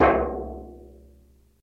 Beat on trash bucket (light & long)